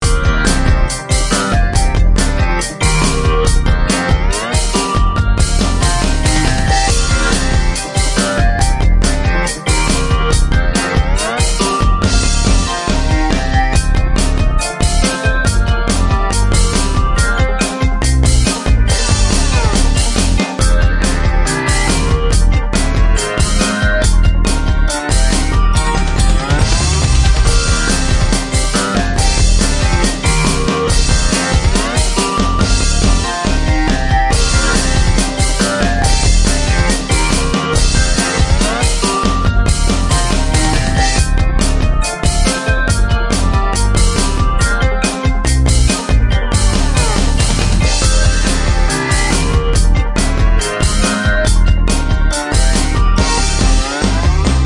Original Rock Music Loop. 140 BPM Key of C-Minor. Synth Bass Drum FL Studio.